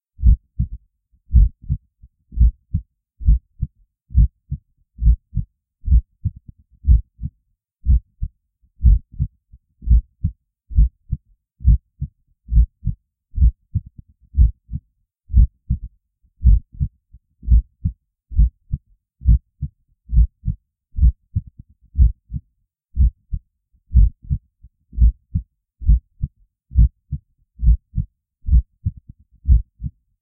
Heart Beat
A fantastic and genuine heartbeat sound I created and after much processing, uploaded.
bump, heart, tense